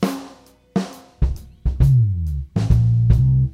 beryllium-loop-drum
live drum and a bass doing a loop-able riff
bass, drum, loop